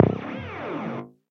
Sounds like an alien weapon, laser beam, etc.
Processed from some old experiments of mine involving the guiar amp modelling software Revalver III. These add some echo added for extra cheezy sci-fi effect.
Maybe they could be useful as game FX.
See pack description for more details.